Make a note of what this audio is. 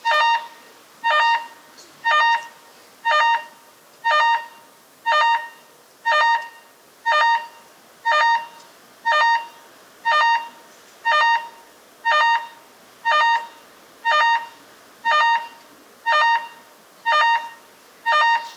Alarm outside a building. Several beeps.
beep, larm, alarm